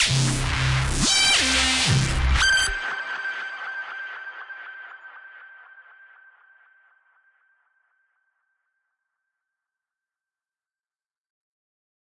This is used by Sylenth 1 with Amp FX'S